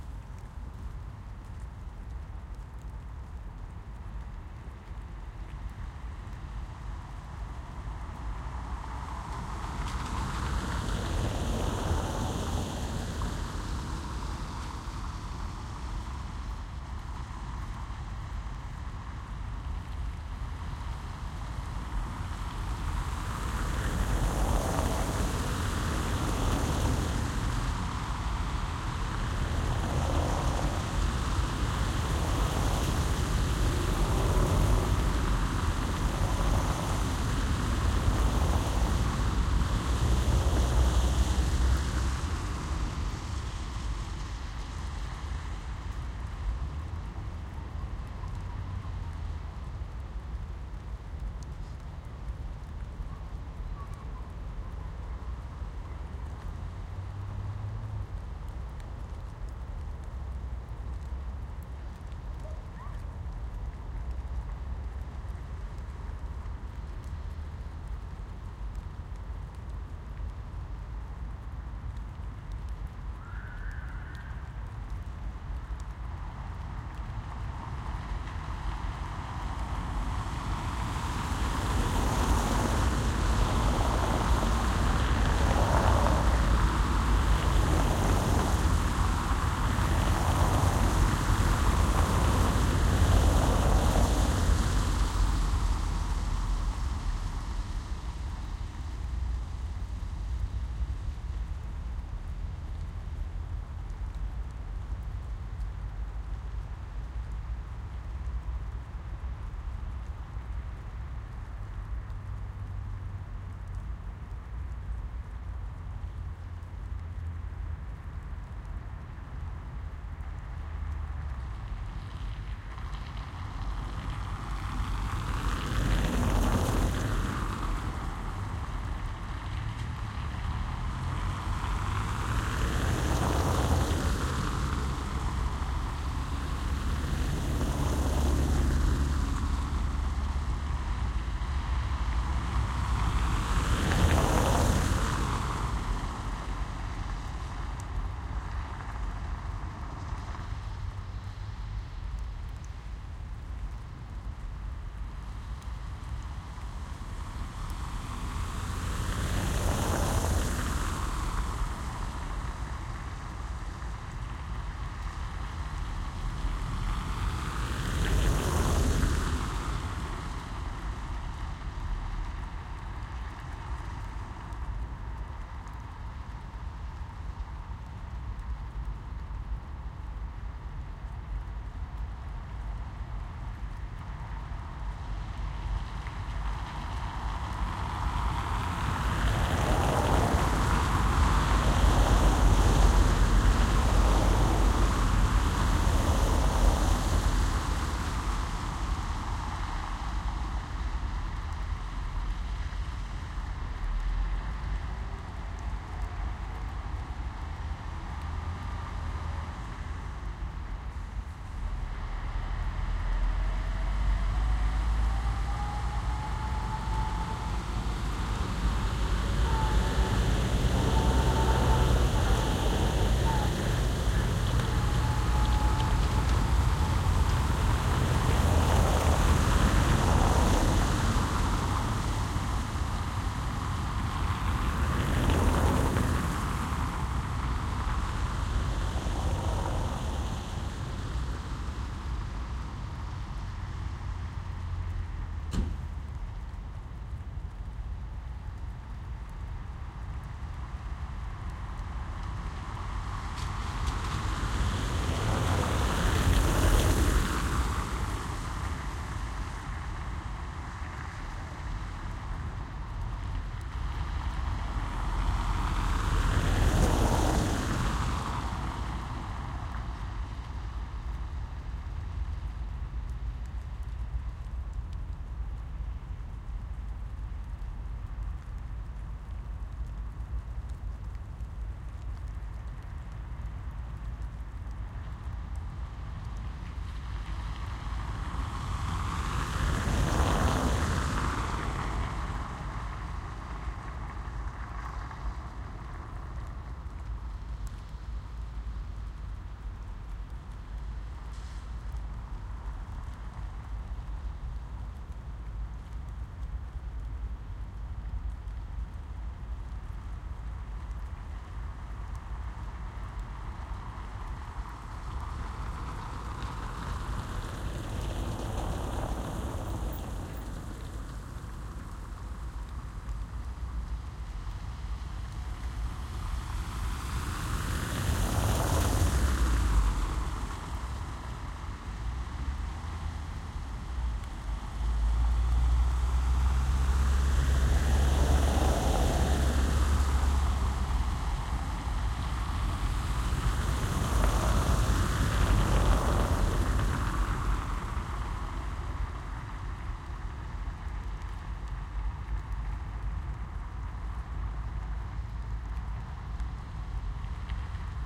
Cars passing the Microphone from side to side on a wet cobblestone street. Raindrops are audible close to microphone, city noise in the background.
Recorded with a ~30cm AB pair of Neumann KM183 Microphones on a Zoom H4.